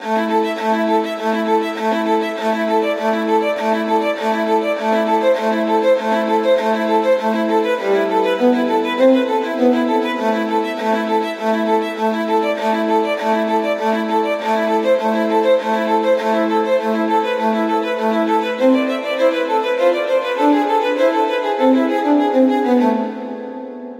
Stradivarius Solo Arpeggio Melody 200 BPM
Experience the beauty of a Stradivarius solo arpeggio melody, perfect for use in documentary soundtracks. This stunning piece of music captures the essence of the renowned Stradivarius violin, with its rich and expressive tone that is sure to captivate your audience. File is in 200 BPM.
You can add it to your documentary soundtrack, film score, or other creative project to give it a touch of elegance and sophistication.